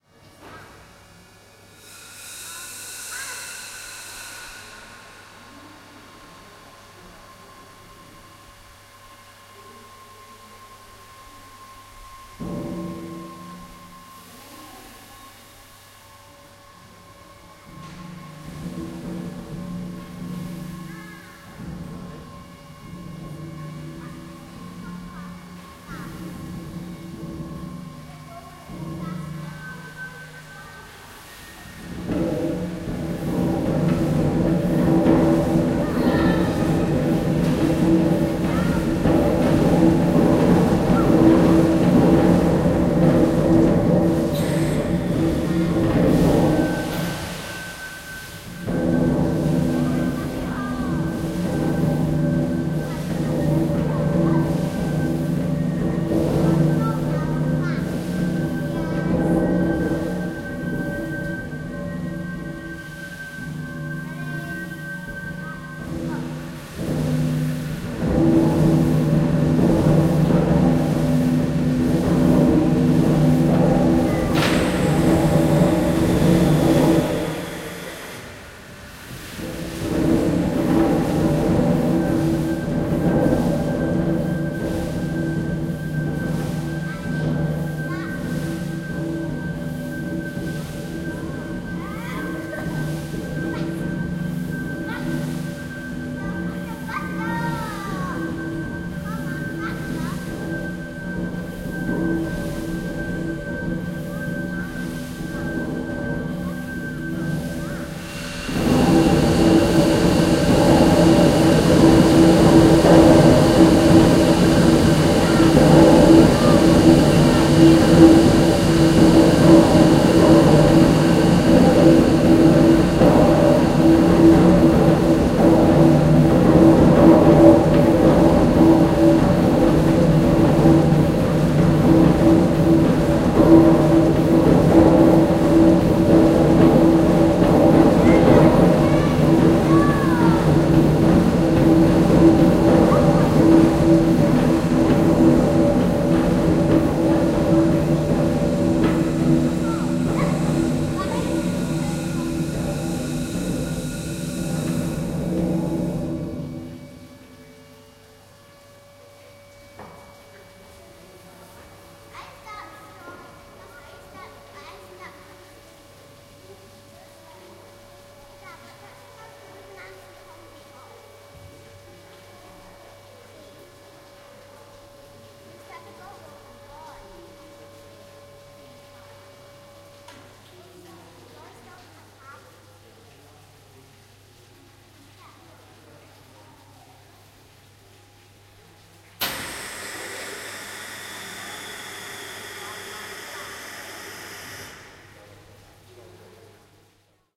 Sound from the River Don Engine located and demonstrated by a technician at the Kelham Island Museum in Sheffield. Recorded on May 27, 2018, with a Zoom H1 Handy Recorder.